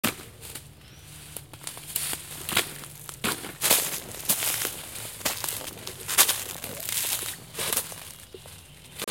Sound taken during the international youth project "Let's go urban". All the sounds were recorded using a Zoom Q3 in the abandoned hangars U.S. base army in Hanh, Germany.
ambient, dark, deep, drone, effect, experimental, fx, germany, hangar, pad, recording, reverb, sampled, sound-design, soundscape, zoomq3